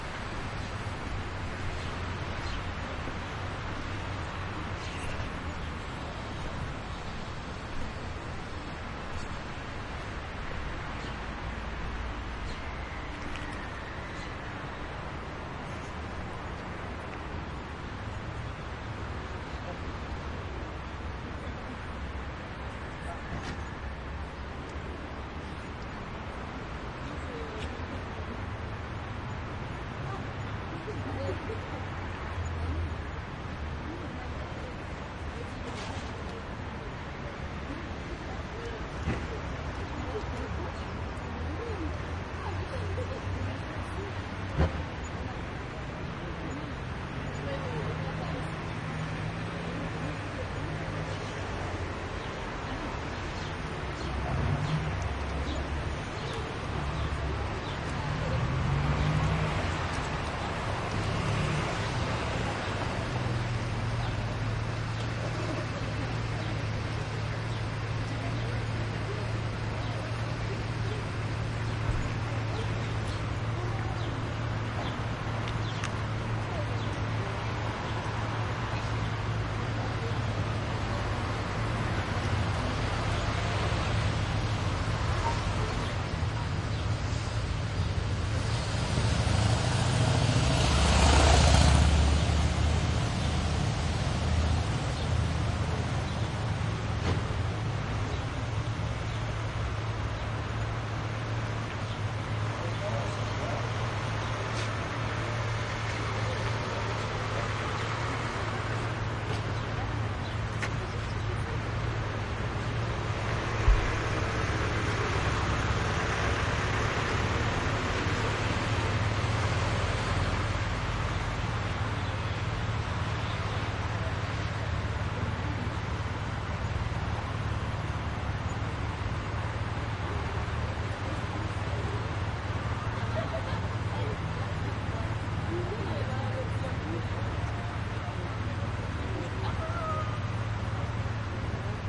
city square busy traffic +distant voices and manhole Marseille, France MS
city, Marseille, busy, traffic, France